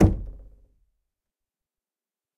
Door Knock - 36
Knocking, tapping, and hitting closed wooden door. Recorded on Zoom ZH1, denoised with iZotope RX.